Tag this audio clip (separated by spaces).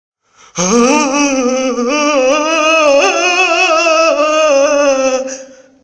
man animation